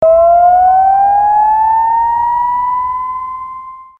bumbling around with the KC2
electric, kaossilator2, sound